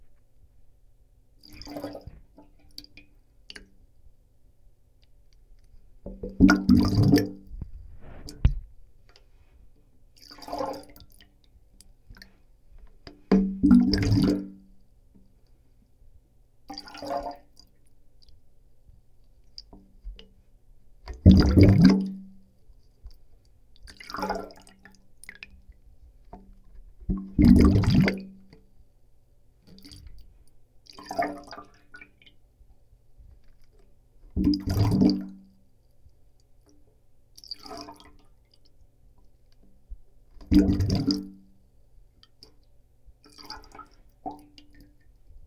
underwater bubbles made with a small plastic cup
bubble, bubbles, bubbling, glug, gurgle, hydrophone, liquid, submerged, underwater, water